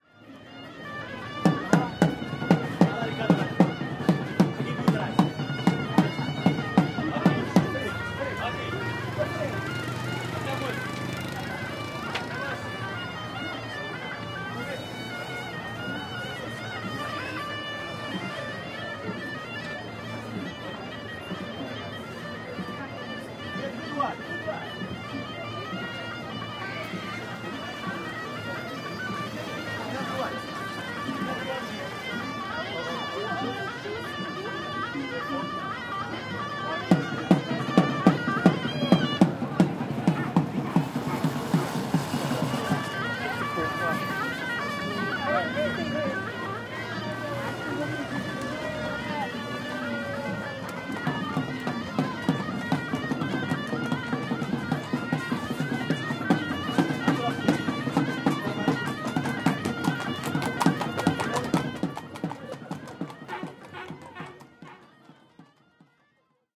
Jamaa el Fna Afternoon Atmosphere 1
Afternoon atmosphere at on the famous place Jamaa el Fna in Marrakech Marokko.
You can hear people talking or making business, snake charmer with flutes and cobra snakes and mopeds drive across the square.